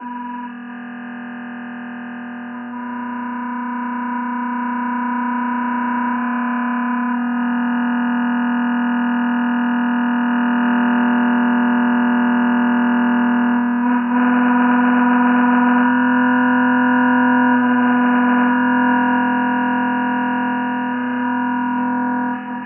An experiment to see how many sounds I could make from a monophonic snippet of human speech processed in Cool Edit. Some are mono and some are stereo, Some are organic sounding and some are synthetic in nature. Some are close to the original and some are far from it.
processed, sound, ambient, soundscape, synthetic